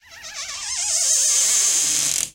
Recording of the hinge of a door in the hallway that can do with some oil.
creaking, creak, hinge, door